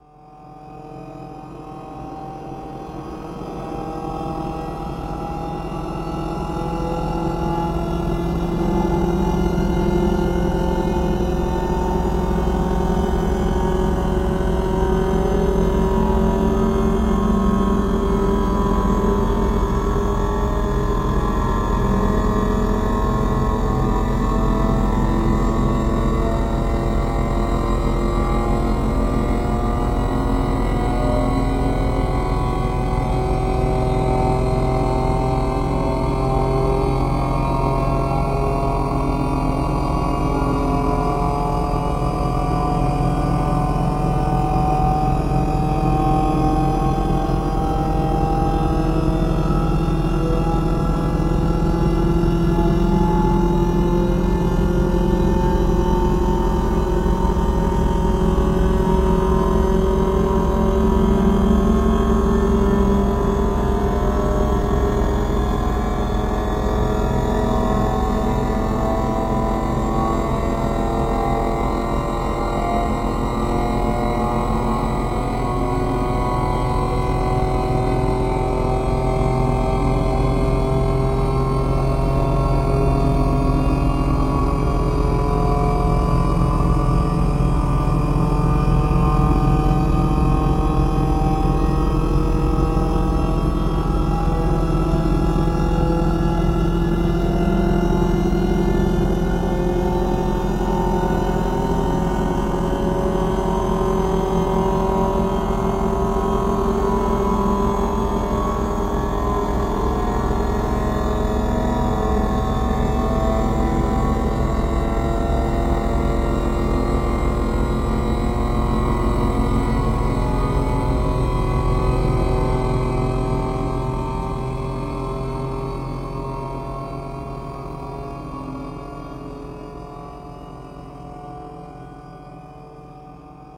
Dark Shepard Tone 3
Endlessly rising Shepard tone created on Renoise DAW with the help of "mda Shepard" and few other modifications were applied. May be applied to intense action or horror scenes in movies or videos...
movie, shepard, renoise, thrill, creepy, sinister, glissando, scary, ascending, intense, film, ost, zimmer, dark, soundtrack, phantom, suspense, atmosphere, fear, anxious, hans, trippy, spooky, tone, horror, risset, drone, rising, haunted